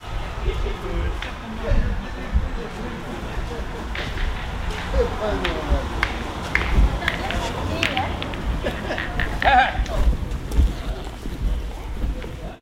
A minidisc recording of people playing boules. You can hear voices and the sound of the balls being kicked together. I recorded this in Collobrieres, France.
ambience, field-recording, france, game, noise, voice